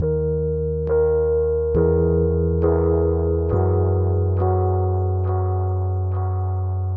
A soft ambient loop of Rhodes piano played through an echo. There is also a sine wave one octave down to create more bass.
loop, rhodes, ambient, echo